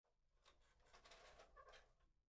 62 Carro Pasa Frena
Cuando pasa un carro
carro, pasa, que